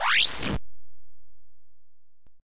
A warp or teleport.
adventure, effect, fairy, fantasy, game, game-sound, magic, magical, magician, rpg, sparkly, spell, teleport, video-game, wand, warlock, warp, witch, wizard